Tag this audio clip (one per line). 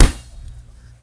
convolution
free
impulse
ir
response
reverb